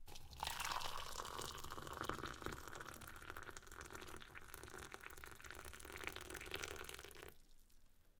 Tea Pouring
Tea being poured into a cup.
Recorded on Zoom H6 with Rode NTG 2.
Tea, Water, Liquid